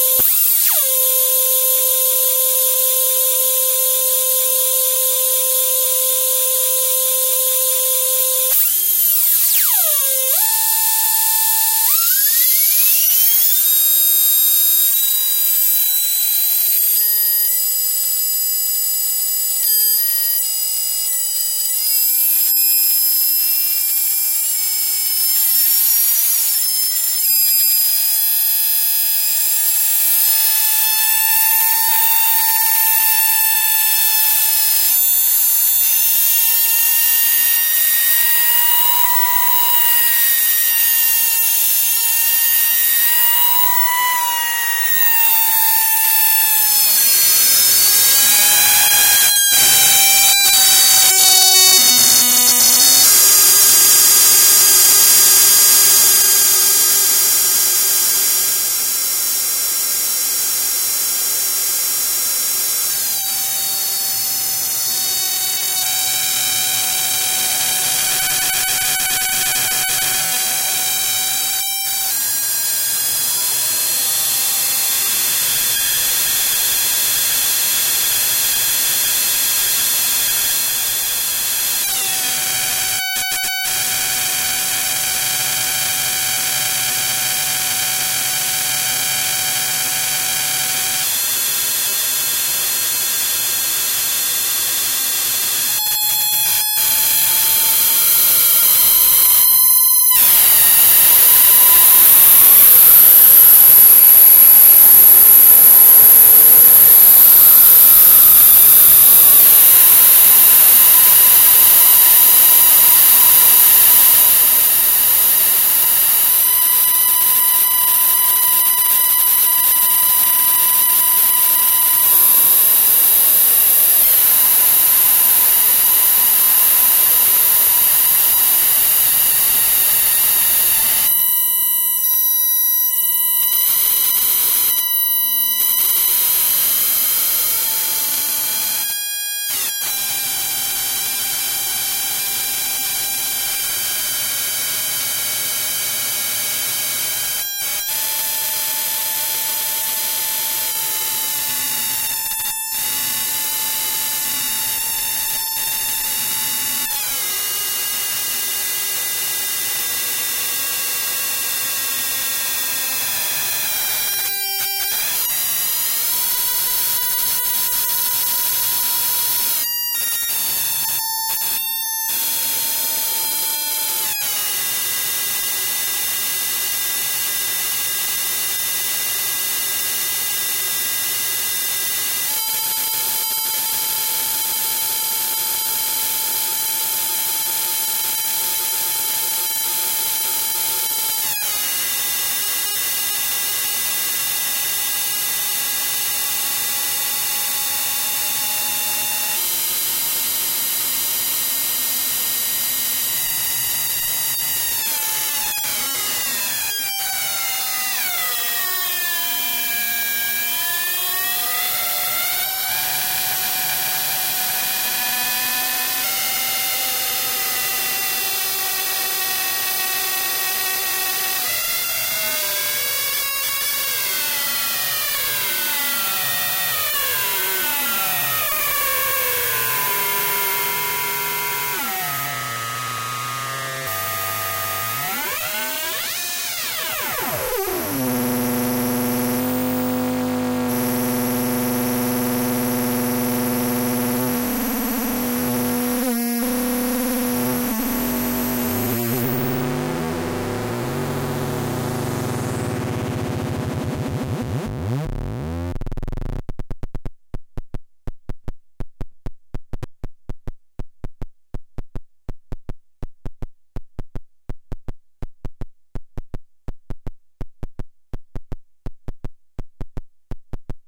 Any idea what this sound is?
VCS3 Sound 11
Sounds made with the legendary VCS3 synthesizer in the Lindblad Studio at Gothenborg Academy of Music and Drama, 2011.12.09
A sound with a screaming, alarm-like character.